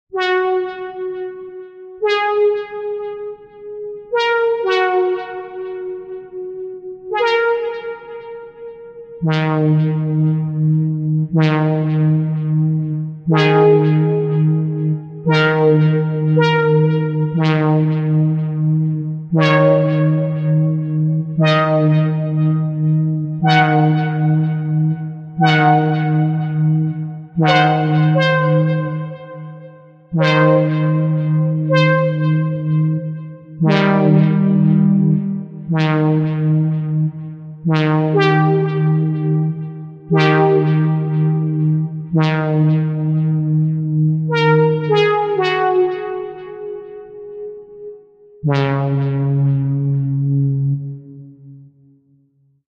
Flutter wave melody
a Flutter Wave synth created on a MIDI keyboard
to use just show me in comments
Electro, melody, Pop